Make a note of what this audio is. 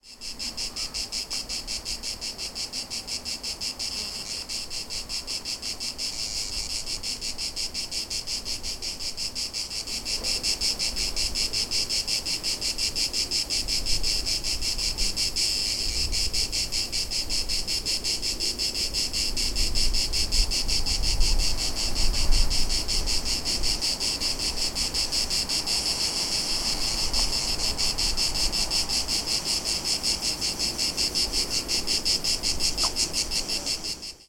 Son de cigales en Provence. Son enregistré avec un ZOOM H4N Pro et une bonnette Rycote Mini Wind Screen.
Sound of cicadas in Provence. Sound recorded with a ZOOM H4N Pro and a Rycote Mini Wind Screen.
cicadas, crickets, field-recording, insects, nature, south-of-france, summer